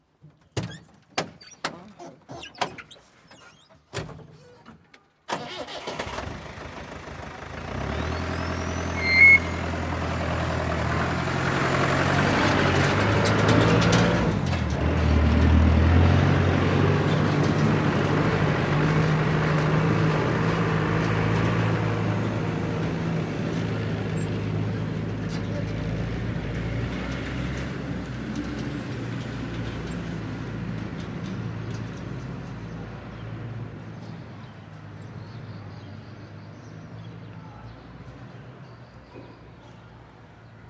truck big diesel water tank start back up short beep pull away slow speed dirt road Gaza 2016
beep, short, truck, away, start, big